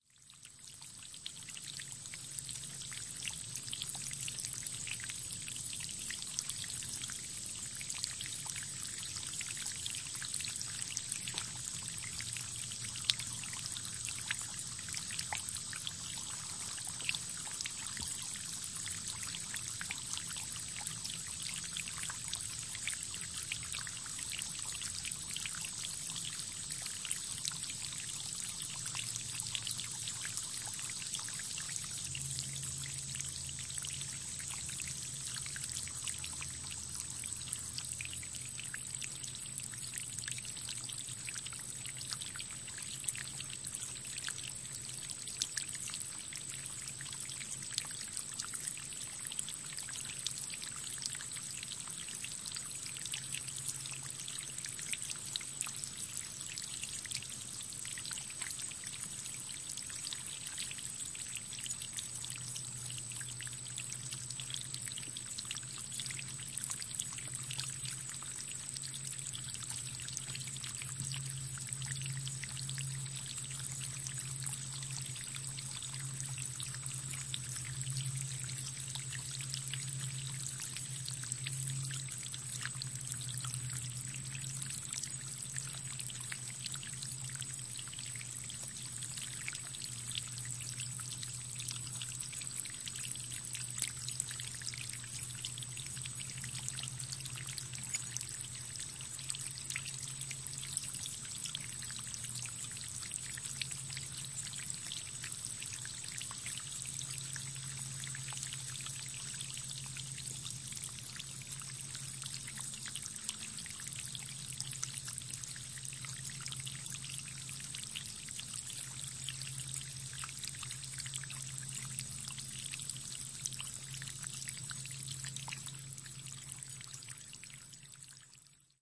Recording of a small creek, water tumbling over a small limestone rock. I placed my Zoom H4N recorder with built-in microphones so close that you will hear the ocassional "pop/puff" as a water drop actually hits the big foam wind-screen.
forest, Splashing, woods, Summer, insects, peaceful, Field-Recording, Creek